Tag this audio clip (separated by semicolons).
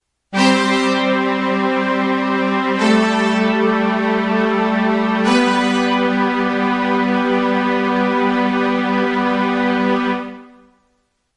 animation; blackout; film; game; movie; video; video-game